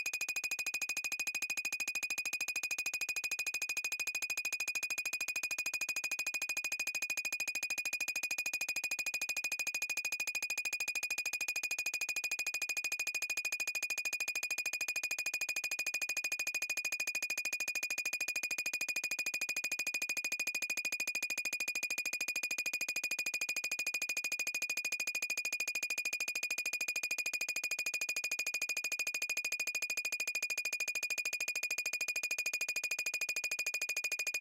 Sci-fi Gun Shot - Maths } Macro-Osc Mutable Inst. Braids
gun shot maths macro oscillator mutable braids instrument make noise sounds environment natural surrounding field-recording ambient ambience noise scrub sci fi
fi, gun, surrounding, macro, make, ambience, sci, oscillator, braids, environment, instrument, sounds, shot, natural, field-recording, noise, ambient, scrub, maths, mutable